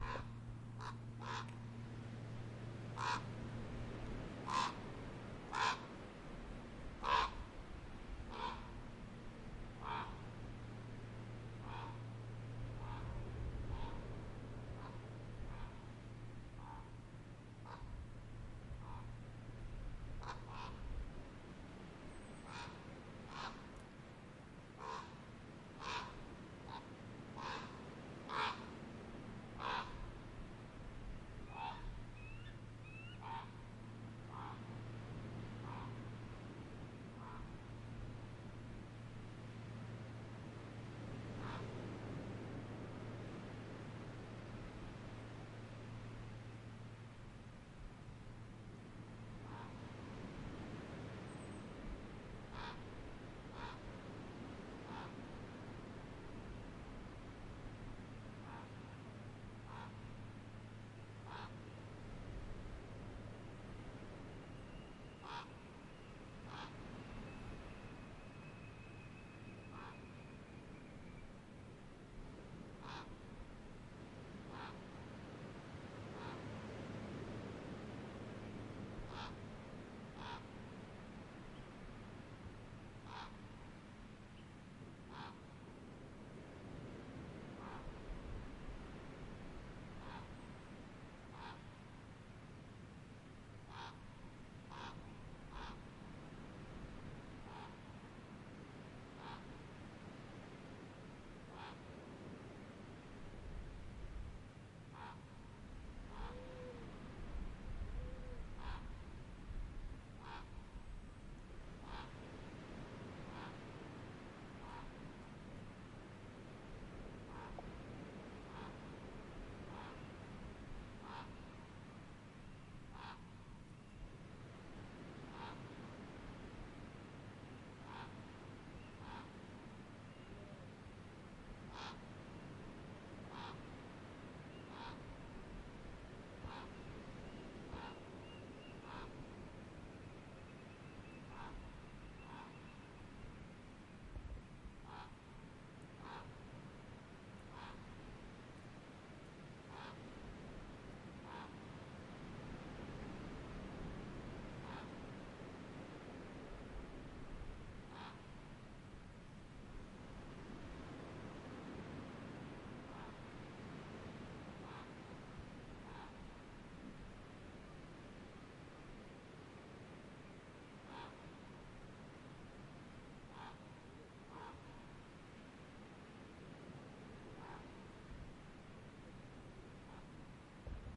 ravens at beach
recorded on west coast Vancouver island with H5 recorder sunny summer day hanging in the sand watching a couple ravens fly around